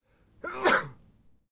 Sneeze, Single, A
Raw audio of a single, quick sneeze.
An example of how you might credit is by putting this in the description/credits:
The sound was recorded using a "H1 Zoom V2 recorder" on 21st July 2016.
sneezing, achoo, sneeze, single